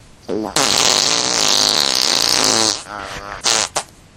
A fart that surprised me one morning.